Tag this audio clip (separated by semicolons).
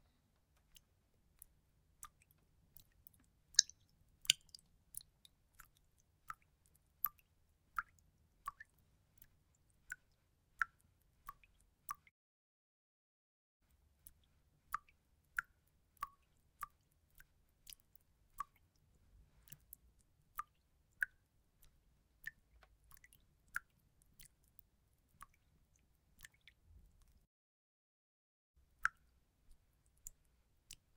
dripping; droplets; Water